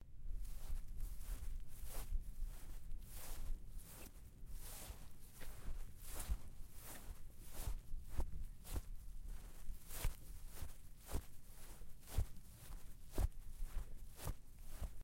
Walking on grass (slowly)
Walking on grass slowly